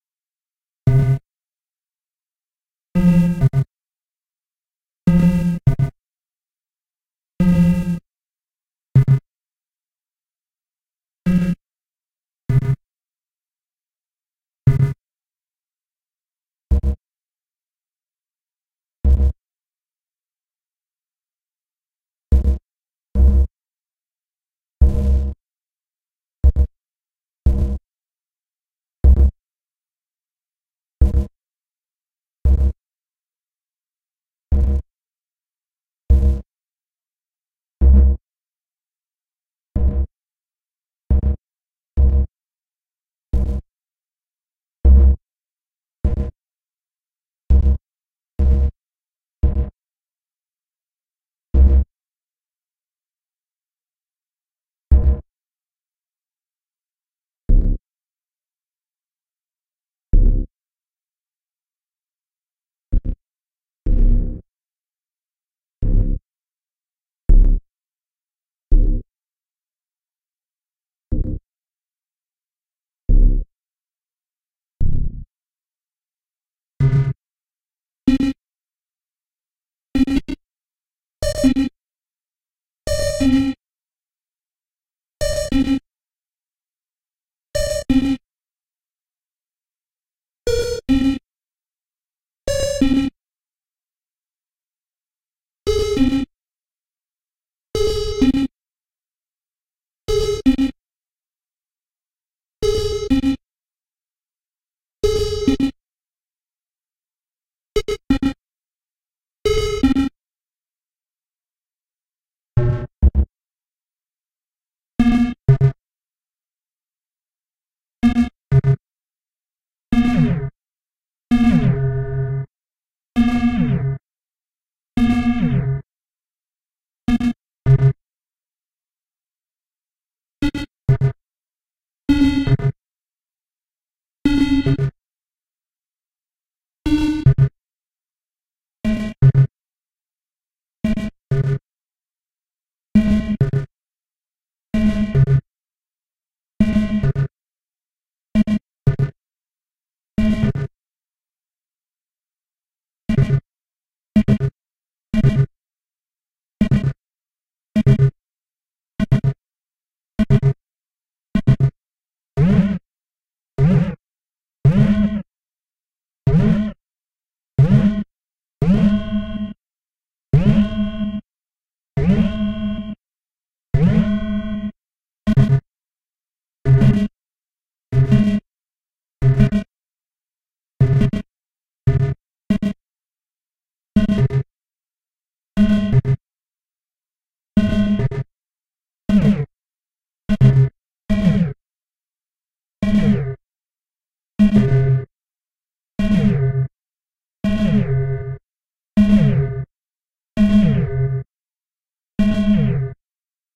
Some "access denied" tones generated with NI Massive.